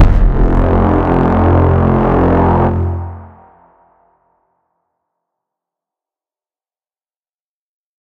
As requested by richgilliam, a mimicry of the cinematic sound/music used in the movie trailer for Inception (2010).
cinematic, horns, inception, request, stab, trombone